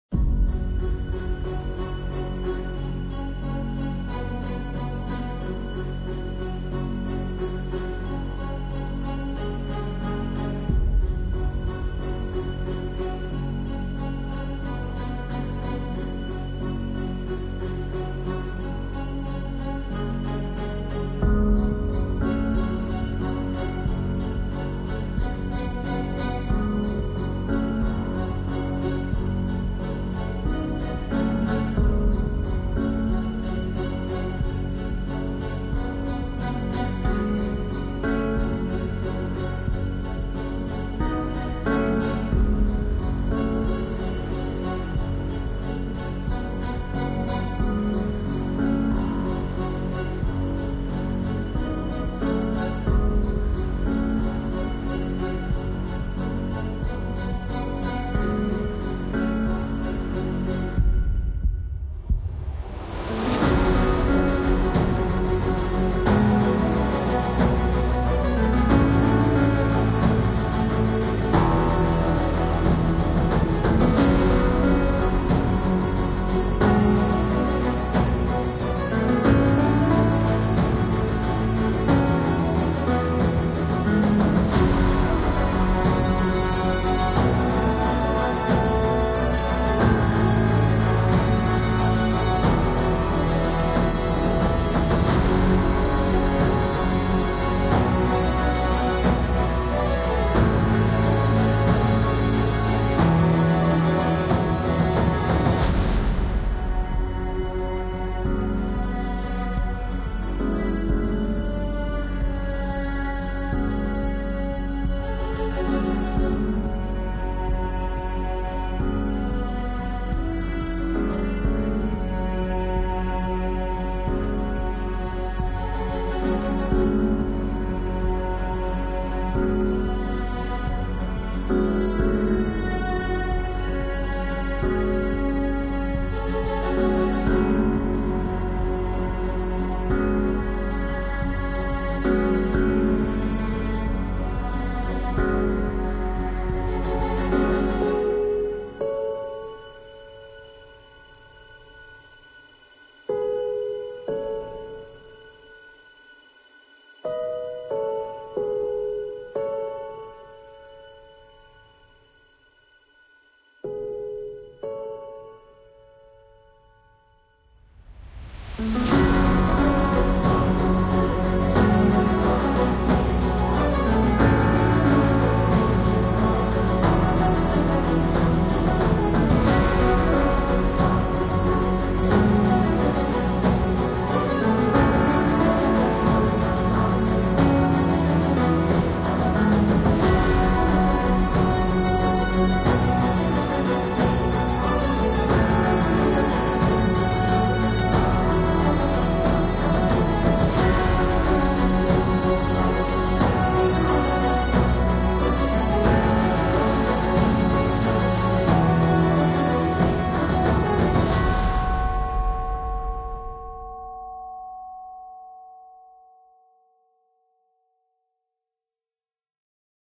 Orchestra Music
Genre: Epic Orchestra
Track: 60/100
strings, woodwinds, epic, piano, orchestra, brass, cinematic, bass, percussions